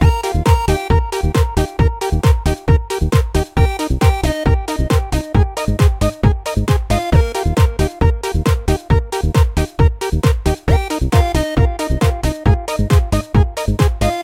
A short loop with a happy theme. High dynamic range.
This was made to work on a wide volume-range without using any compression